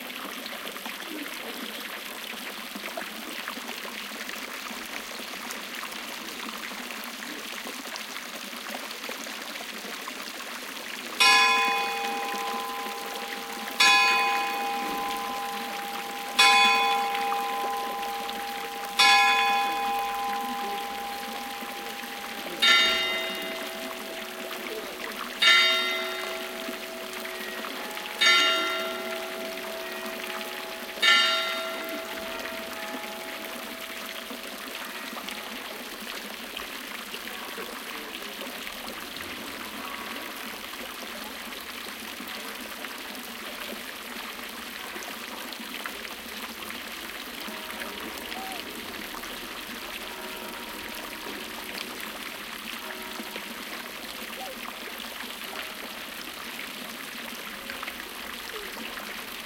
Fountain in front of the town hall of the small town Schiltach in the Black Forest region of southern German. Striking of the town hall clock, people talking at the restaurant terrace in the background. OKM binaurals with preamp into Marantz PMD751.